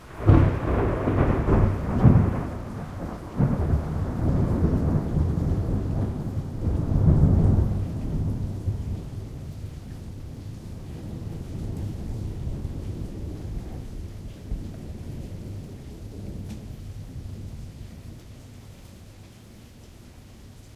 Thunder deep rolling
Loud thunder clap. Deep, rolling. Summer storm. Midwest, USA. Zoom H4n, Rycote Windjammer
Storm, Thunder, Weather